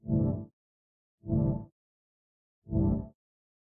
UI 4-1 Atmosphere(Sytrus,arpegio,multiprocessing,rsmpl)
Sounding commands, select, actions, alarms, confirmations, etc. Created in a synthesizer SYTRUS with subsequent processing. Perhaps it will be useful for you.